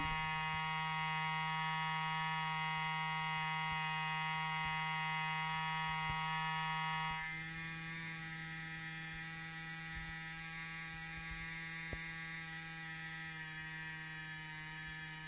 Small electronic motor
electronics, motor, servo